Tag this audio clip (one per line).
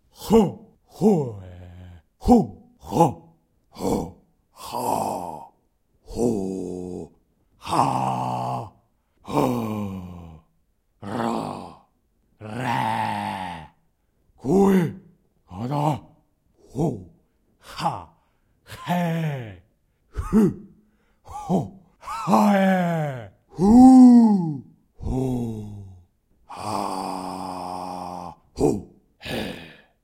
attack
battle
chant
confirmation
feedback
fighting
game
ha
mage
male
native
nordic
norse
rts
shout
shouts
skyrim
spell
strategy
tribal
tribe
viking
vocals
voice
war
wizzard